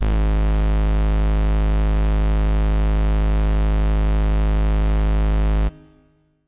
The note G-sharp in octave 1. An FM synth brass patch created in AudioSauna.